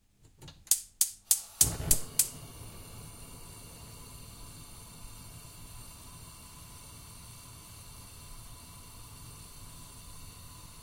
Gas hob ignition
Igniting a gas burner on a kitchen worktop and letting it run. Picked the biggest hob to get the best sound. Recorded to test close-range capability of internal mics on my Google Pixel 6 smartphone. Edited in Adobe Audition.